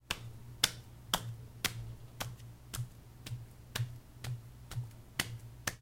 Hands on a wall
Hands climbing a wall